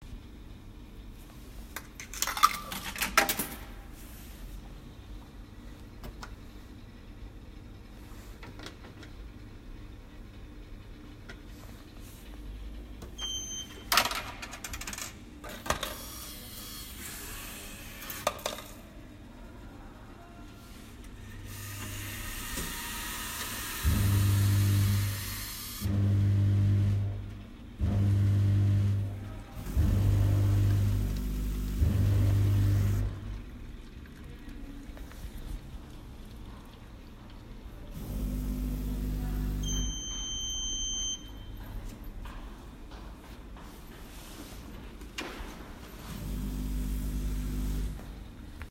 A sound of an old vending machine with a cool BWAH sound
bwah, machine